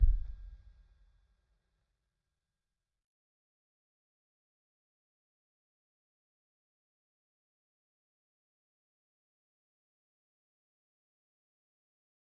Kick Of God Bed 006
home, drum, pack, kit, kick, god, record, trash